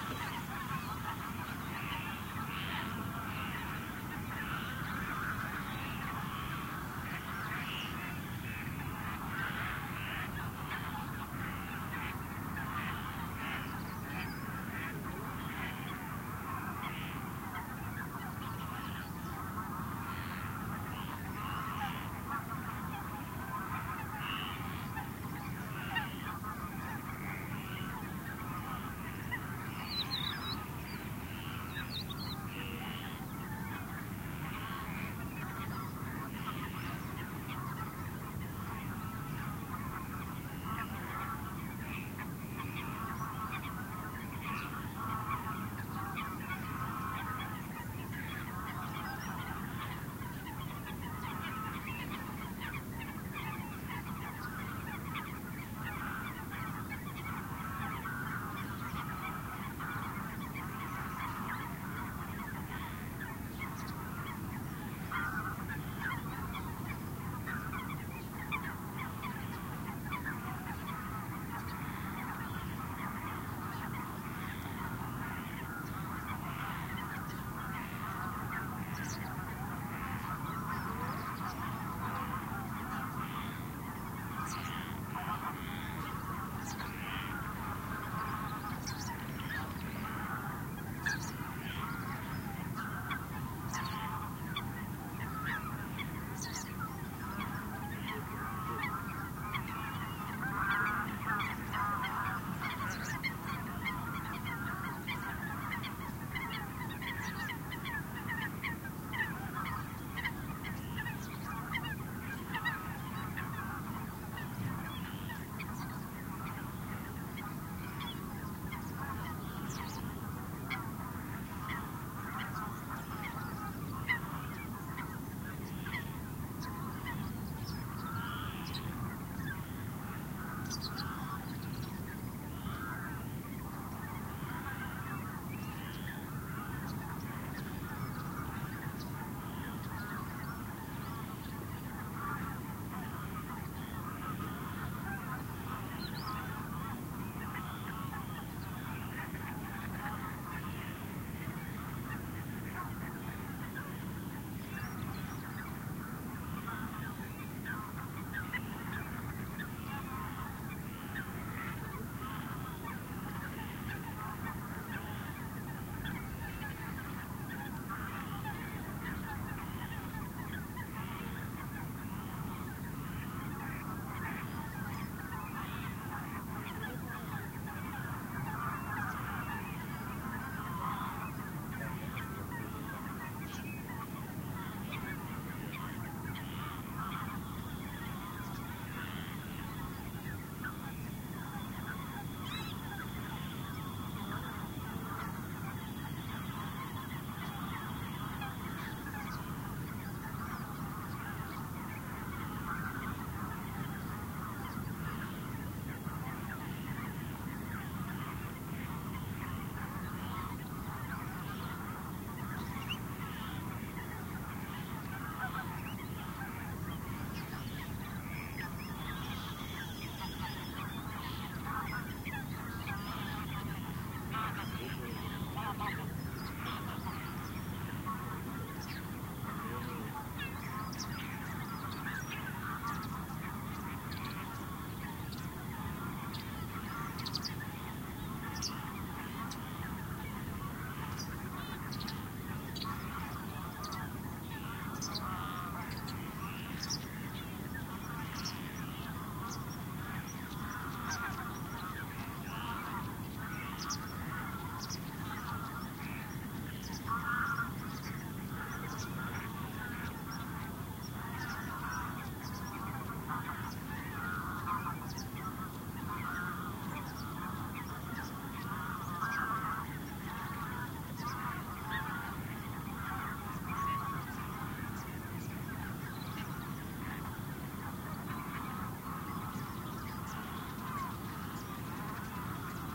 calls from birds and frogs, rumble of waves on a very distant shore. Recorded near El Martinazo, Donana, S Spain. Sennheiser MKH60 + MKH30 into Shure FP24 preamp, Olympus LS10 recorder. Decode to mid/side stereo with free Voxengo plugin
field-recording, nature